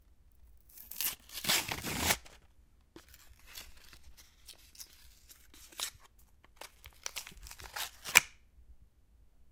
Medical stuff in packaging. It is going out.
Recorded with Zoom H2. Edited with Audacity.
cardboard, foil, foley, packaging, unpacking